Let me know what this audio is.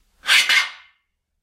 Metal Slide 5
Metal on Metal sliding movement